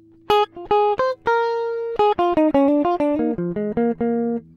guitar jazz2
Improvised samples from home session..
groovie, fusion, guitar, jazzy, pattern, acid, apstract